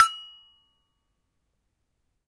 Sample pack of an Indonesian toy gamelan metallophone recorded with Zoom H1.
percussive, hit, metallophone, metal, metallic, percussion, gamelan